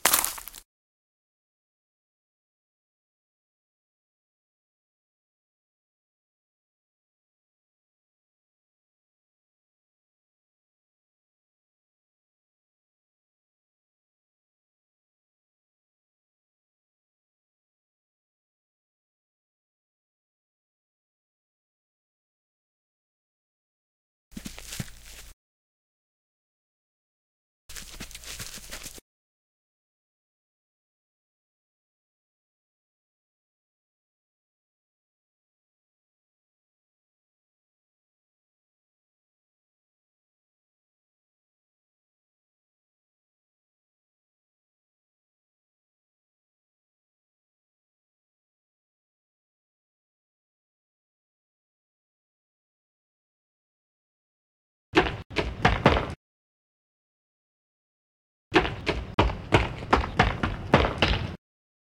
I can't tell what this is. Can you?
Walking foley performance 5
multi-surface, multi-environment walking foley session from the movie "Dead Season.
boot, dead-season, walk, dirt